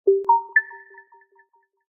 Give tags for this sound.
beep scifi game mobile robot artificial computer GUI data Ui application app windows machine achievement interface android

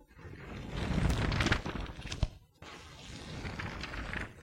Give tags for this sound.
flip paper ruffle turn

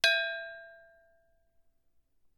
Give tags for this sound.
ring arcosanti

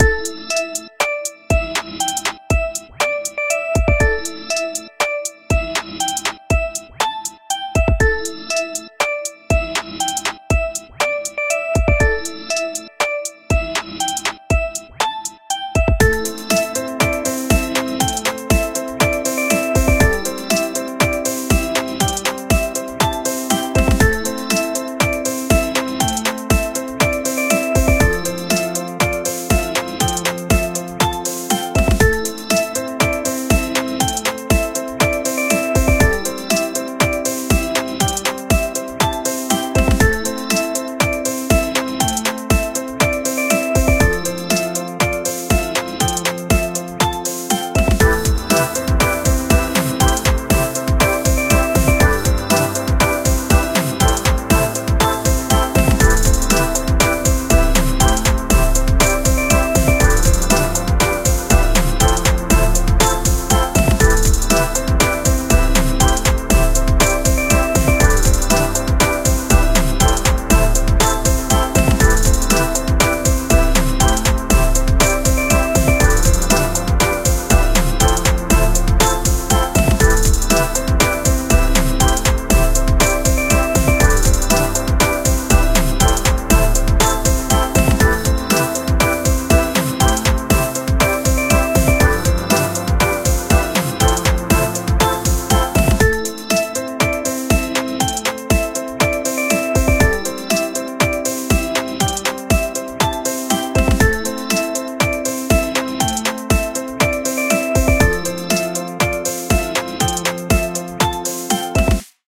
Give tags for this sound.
cold drip remake trap

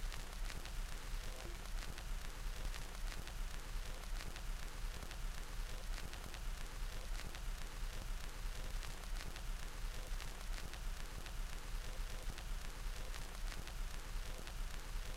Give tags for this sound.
vinyl record vintage turntable record-player

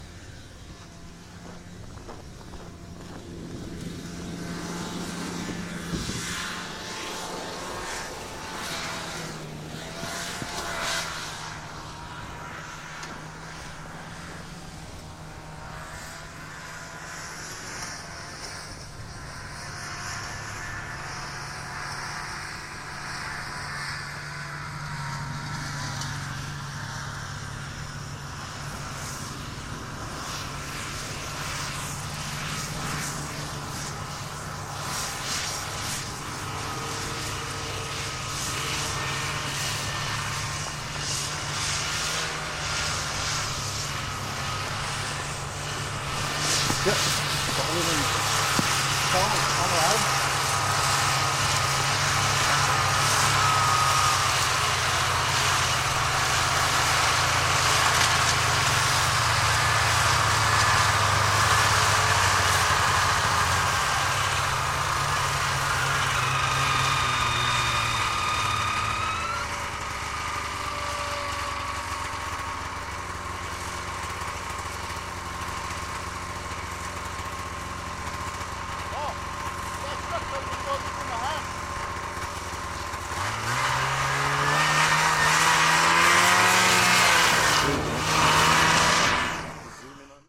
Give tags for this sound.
pull up snowmobiles

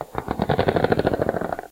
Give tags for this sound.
ball LG-V30 physics roll rubber-bands science

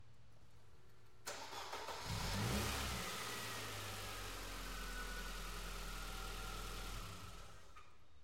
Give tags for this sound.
benz car dynamometer dyno engine mercedes start vehicle vroom

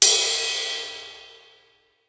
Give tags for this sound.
cymbal drum kit

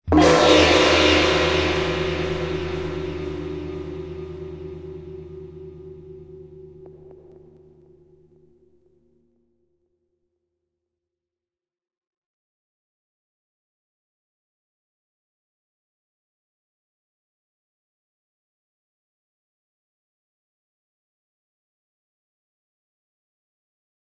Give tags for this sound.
alien china effect gong reverb space

